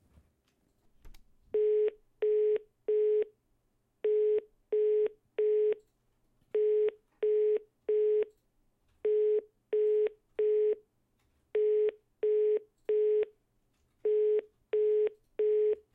I tried to Call a friend an recorded the Signal-Sound.
Busy-Signal, Call, Handy